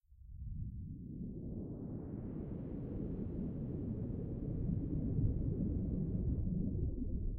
Dive Deep SFX
Synthetic sound of fantastic underwater ambience
Please check up my commercial portfolio.
Your visits and listens will cheer me up!
Thank you.
bit, bite, deep, sfx, sim, simulation, sound, sym, synthsiz, under, water